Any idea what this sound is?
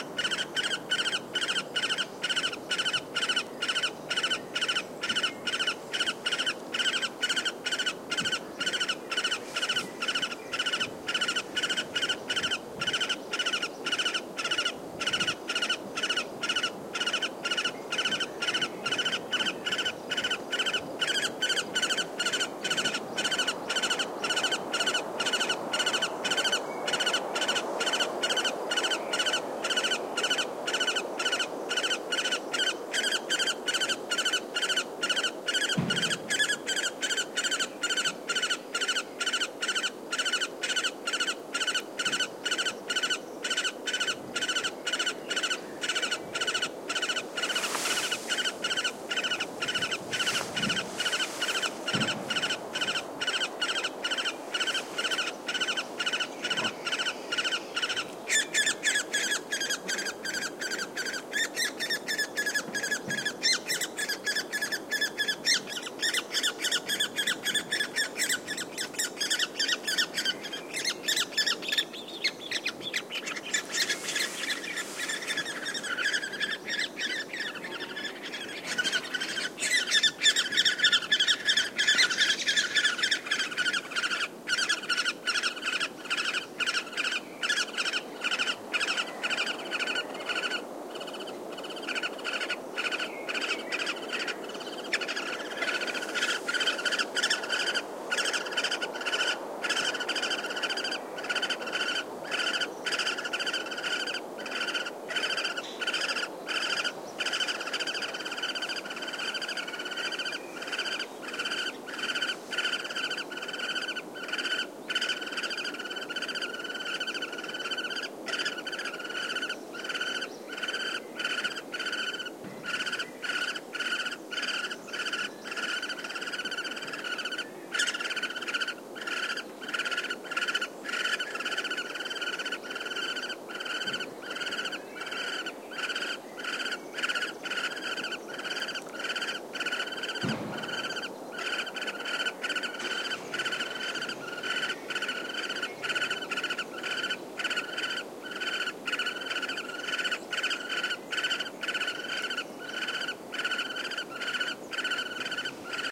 screeching from nestlings of Great Spotted Woodpecker (Dendrocopos major) in their nest - a hole 3 m above ground level in a living Pinus canariensis tree. At about 1 min one parent arrives and screechings become louder and more nervous. PCM M10 internal mics. Recorded at Llanos de la Pez, Gran Canaria